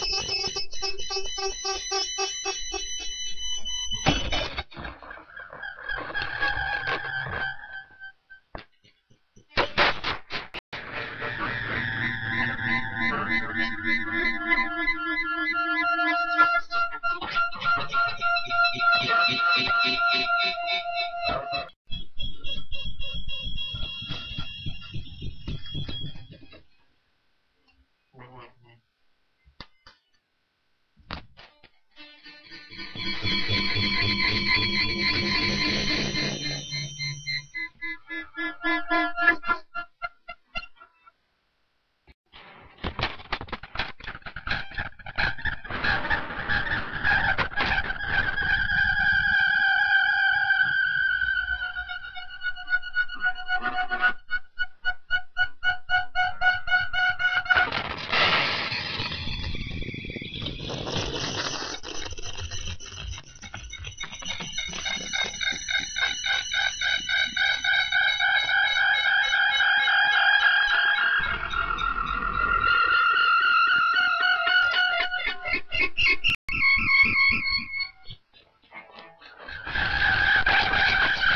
Sound of microphone echo being near the speakers. Recorded in small room . In record you can hear differend sounds that are related on microphone distance , and some clicks being added while recording.